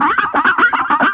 Riff Malfunction 09
Glitched riff from a circuit bent toy guitar